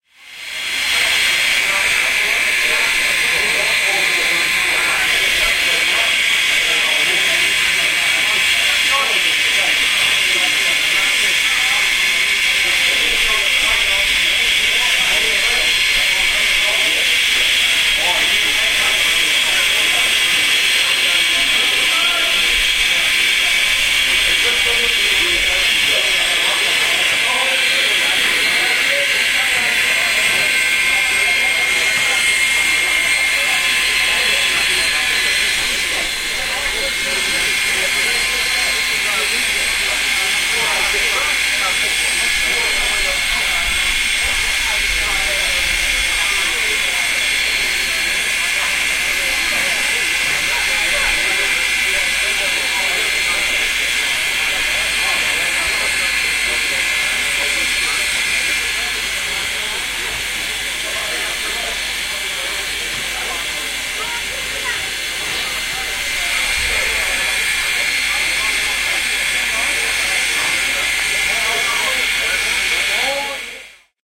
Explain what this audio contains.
Ja Loco 10
New Zealand Ja Class Locomotive coming into, stationed at and leaving Hamilton Station. Homeward bound to Auckland (Glennbrook) after a joint day trip to National Park and back. Recorded in very cold conditions with a sony dictaphone, near 10pm NZST.
locomotive, new-zealand, steam-train